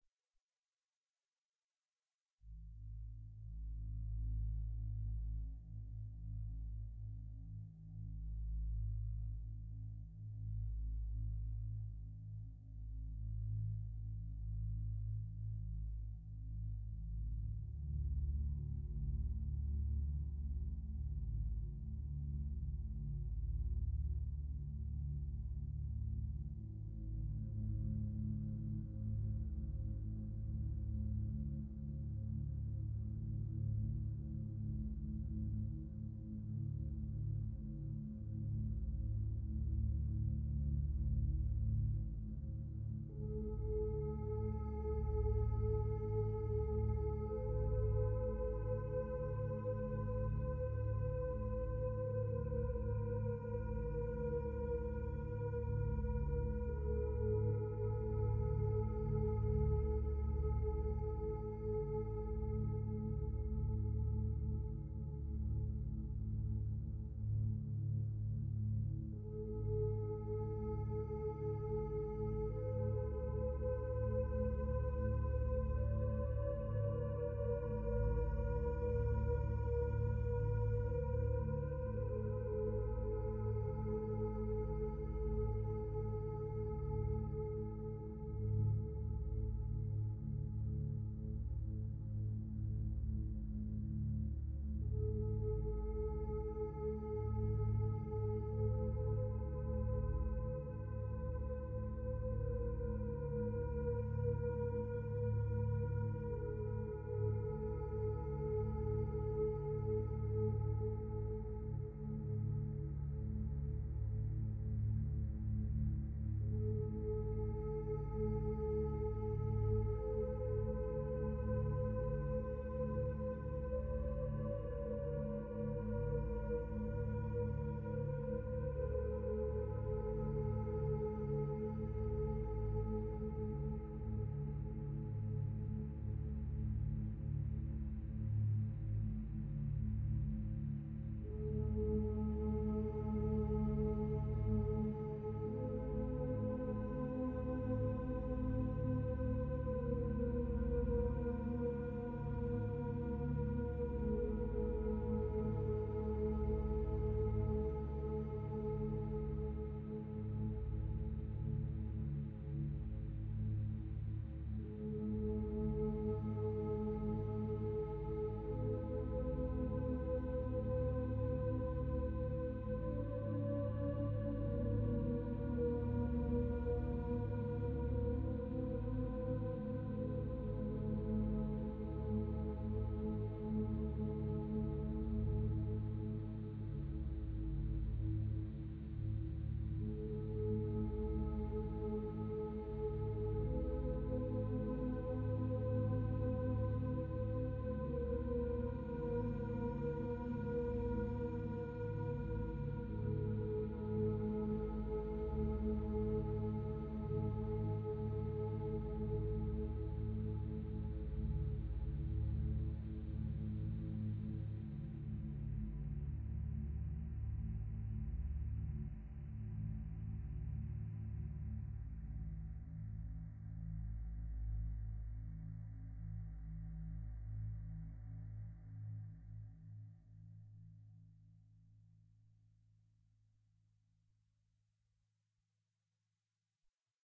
relaxation music #58
Relaxation Music for multiple purposes created by using a synthesizer and recorded with Magix studio. Edited with audacity.
Like it?
music, voice, relaxation